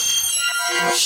scarysplit - cutrev7
Made with Reaktor 5.
aggrotech, scary, noise